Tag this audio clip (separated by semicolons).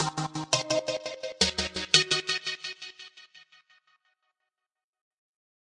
170BPM; 85BPM; Bass; DnB; Drum; gated; loop; Synth